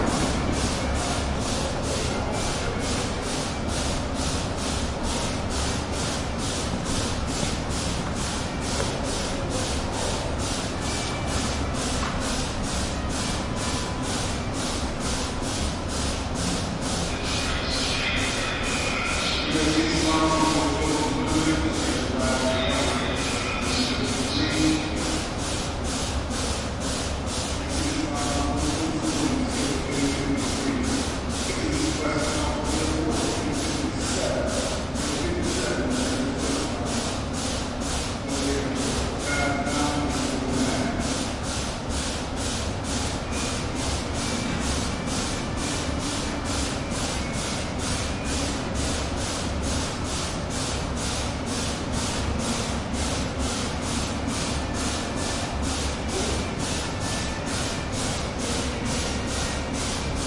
sound if a train releasing air
ambiance, city, field-recording